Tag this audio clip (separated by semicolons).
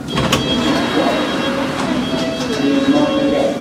ambiance; ambience; announcement; arrive; baker-street; beep; beeps; doors; field-recording; line; london; london-underground; metro; metropolitan-line; mind-the-gap; open; station; subway; train; tube; tube-station; tube-train; underground